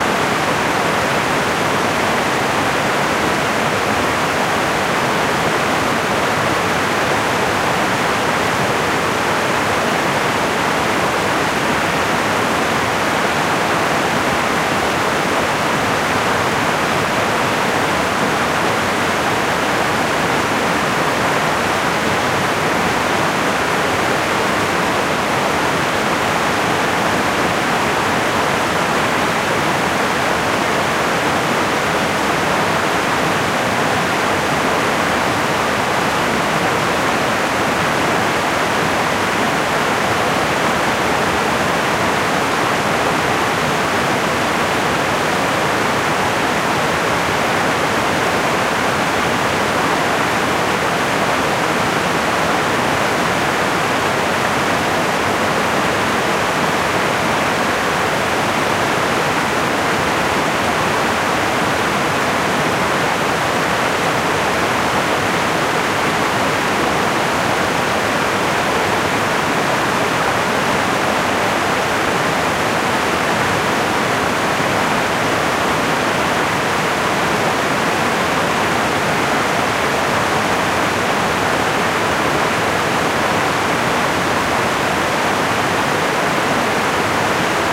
A stereo field-recording of meltwater at a series of small waterfalls in a small river. Rode NT4>Fel battery preamp>Zoom H2 line in